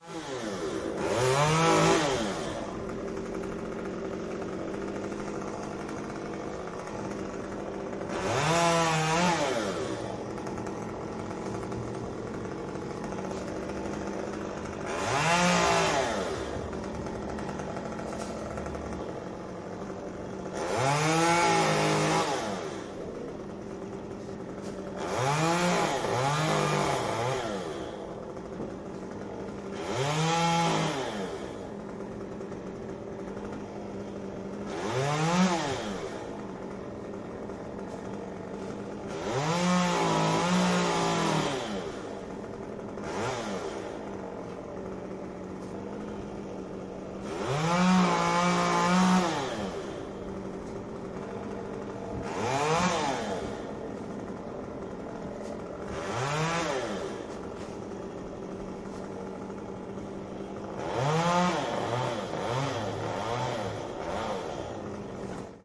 Chain Saw cutting wood power tools edlarez vsnr
Chain Saw cutting wood tree lumber, power tools, clean recording no dialogue or external noise , motor idle between cuts edlarez vsnr